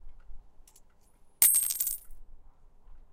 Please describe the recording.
Drop Coins 1
dropping coins on stone floor
floor, coins, drop, stone